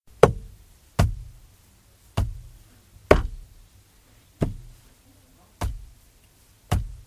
Bashing, Car Interior, Singles, B
Raw audio of bashing several times on the interior of a car. This version is with single bashes at regular intervals.
An example of how you might credit is by putting this in the description/credits:
Inside, Car, Single, Singles, Cars, Interior, One, Bashing, Thud